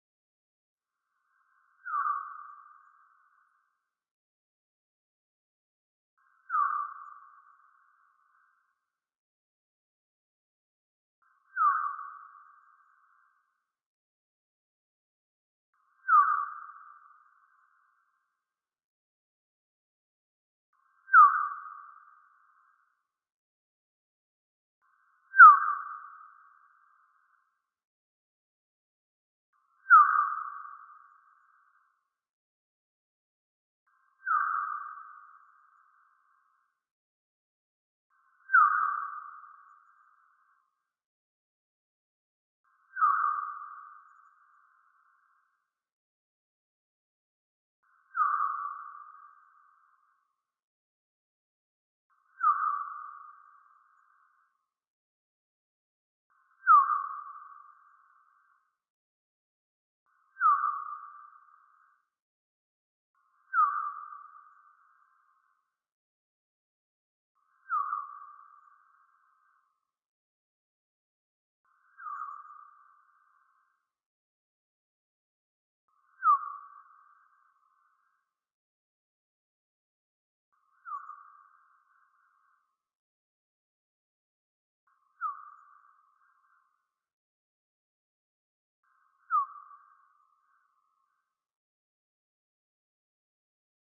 Australian Bat South Australia 16X Slower
Bat navigating around my back yard at night by sound, slowed 16X. Recorded binaurally.
bat, experimental, binaural